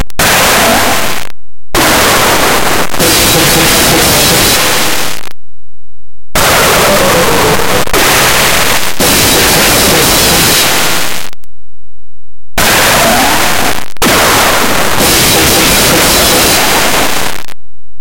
Nic Stage Re 3
This is a remix of Nic Stage's Spaz Loops, Just added a touch of insanity a little bit of 600 BPM
nicstage, remix